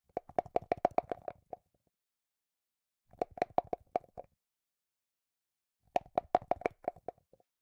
Dice (1) shake in cup var
The name describes what it is: eg. Dice (3) in cup on table = Three dice are put in a cup which stands on a table.
The sounds were all recorded by me and were to be used in a video game, but I don't think they were ever used, so here they are. Take them! Use them!
game, dice, die, foley, yatzy